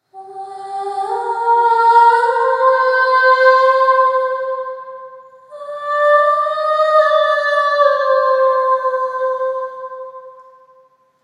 Angelic voice
Mysterious monophonic high pitch voice with reverb.
cinematic, female, vocal